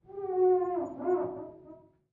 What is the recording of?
mirror resonance 9
Recording the procedure of cleaning a mirror inside an ordinary bathroom.
The recording took place inside a typical bathroom in Ilmenau, Germany.
Recording Technique : M/S, placed 2 meters away from the mirror. In addition to this, a towel was placed in front of the microphone. Finally an elevation of more or less 30 degrees was used.
bathroom, cleaning, glass, mirror, resonance